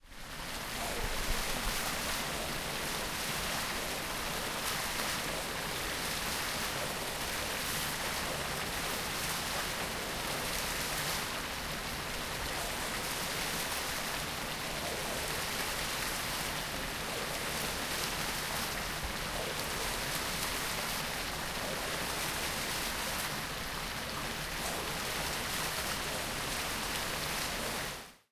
Water, mall fountain 2
Another recording of my local mall's fountain. It sounded different enough that I wanted to upload it.
Water, flowing, rapids